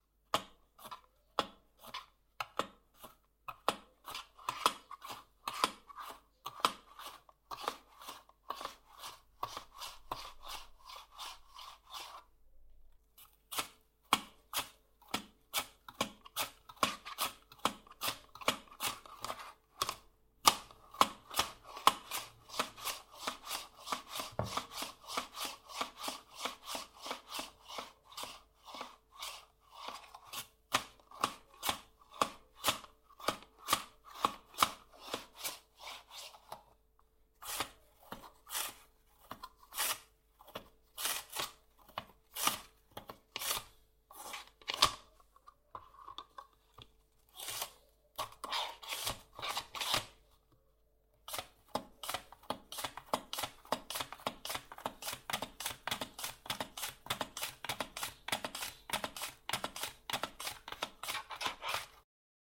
FOODCook Mandolin Cutting Different Vegetables 01 JOSH OWI 3RD YEAR SFX PACK Scarlett 18i20, Samson C01
cutting a carrot a cucumber and a potato on a mandolin
Cutting, Mandolin, OWI, Slice, Vegetable